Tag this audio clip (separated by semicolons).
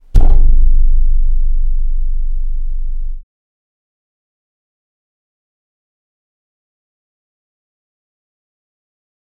hand; metal